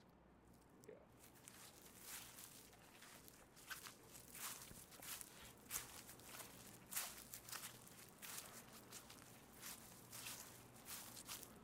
footsteps on leaves
Footsteps walking on leaves
leaves, narrative, sound